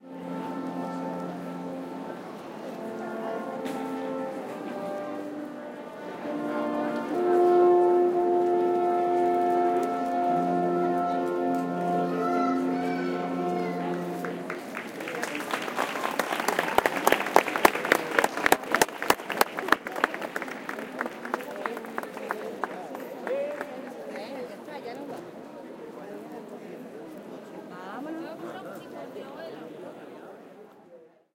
Last notes played by a brass quintet in street performance, voice talking in Spanish in background. Soundman OKM mics into Sony PCM M10
ambiance, brass, field-recording